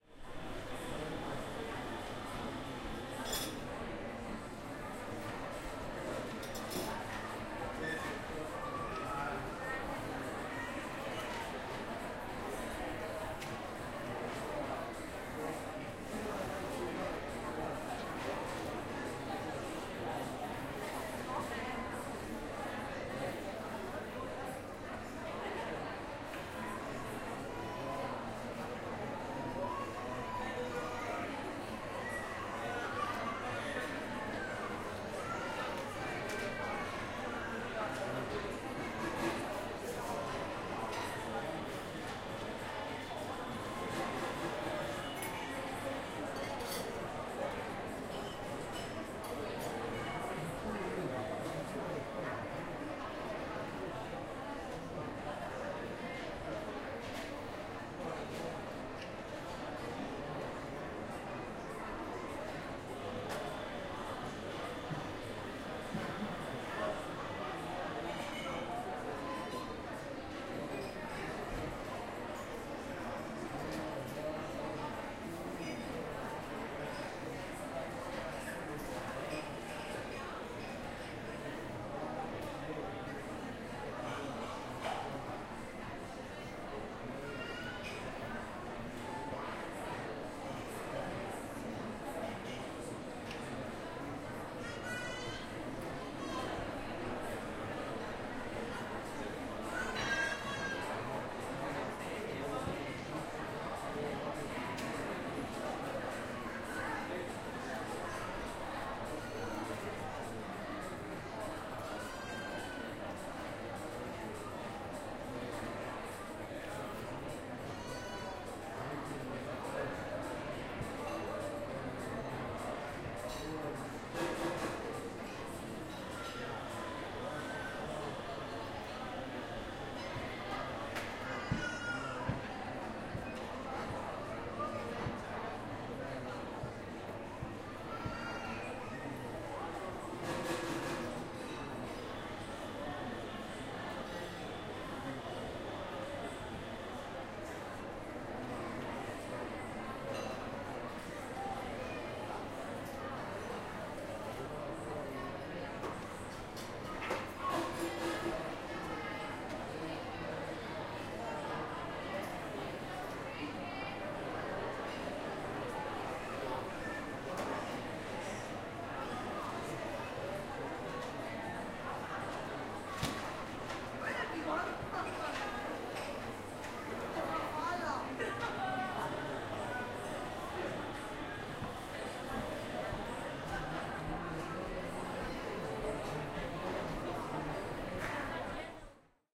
Mall ambiance 2 - food court
Another recording from a mall in Malmö, Sweden. This time I sat in a sort of amphiteater around the food court, which picked up both the sound of people and the hustle and bustle of the restaurants cramped into a small surface area.
field-recording, crowd, market, mall, hall, people, indoors, Ambience, foley